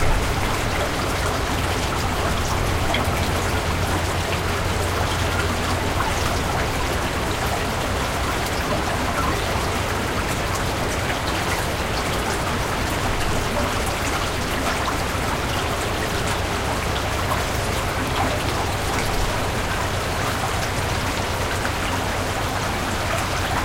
Culvert stream
Culvert, recorded in Hamburg at the Elbe
Abfluss, aufgenommen an der Elbe in Hamburg
flowing, waterpipe, abfluss, water, stream, abflussrohr, culvert, flow, wasser